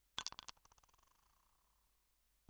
A tablet of aspiring into a empty glass 2
A tablet of aspiring falling into a empty glass. Recorded with a shure condenser mic.
aspiring, empty, glass, tablet